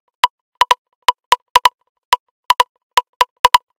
hi pitched rimshot loop